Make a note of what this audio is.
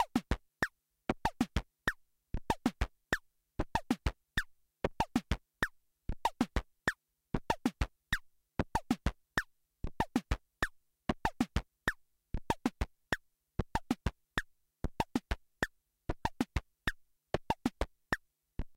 Microbrute clap beat
A clap-type beat made using an Arturia Microbrute analogue synthesiser.